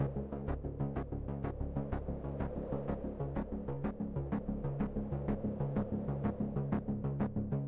Suspense Loop
A suspenseful rhythmic sound which can be seamlessly looped.
ambient, dark, fear, game, horror, loop, panic, scary, suspense, time